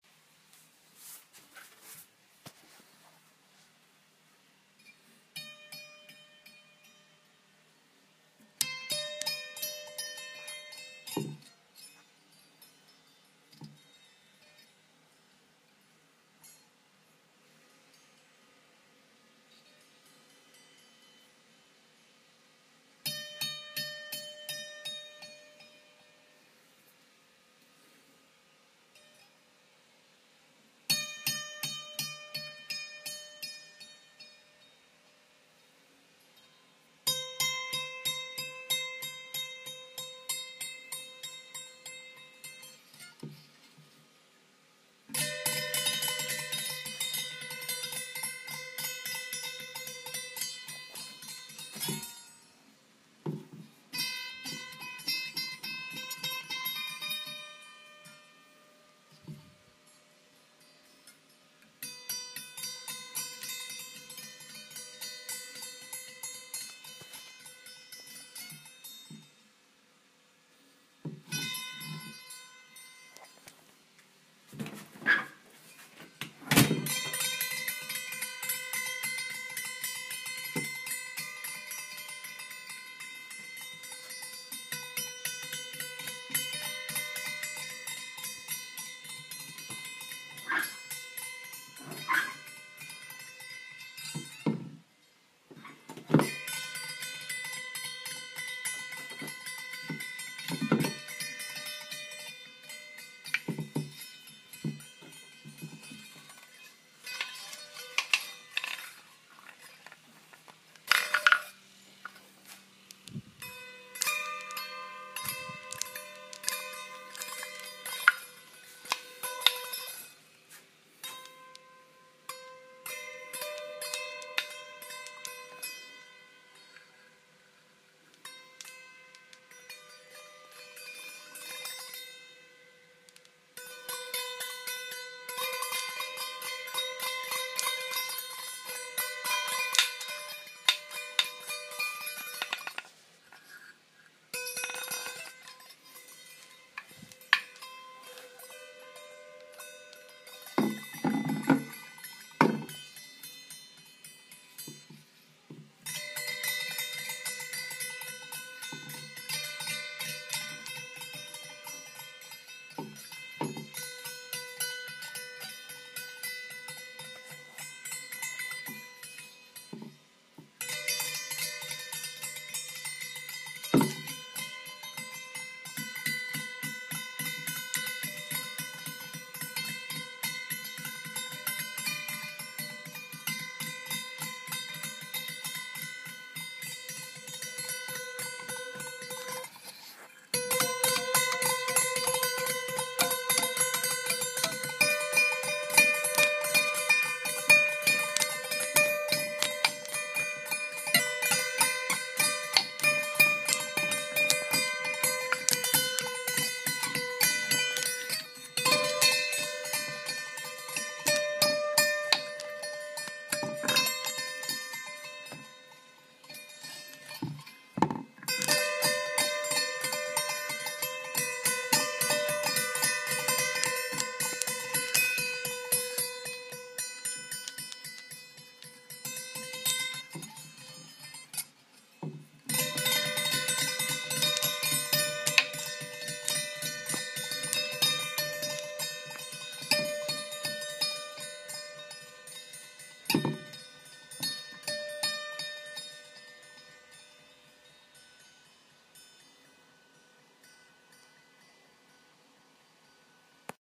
Ding Ding Ding
A metallic dinging sound coming from a small hammer attached to a board of strings. This is a chime-like decoration on a door that makes a nice musical sound when played with.
ding, train, percussion, hitting, incoming, strings, metal